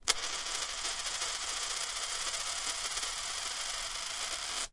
dime spinning on a glass table
change, dime, coin